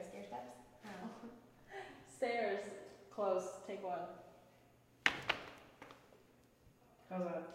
Footstep on stairs
A slightly shaken footstep recorded in a school staircase.
echo, stair, footstep, walk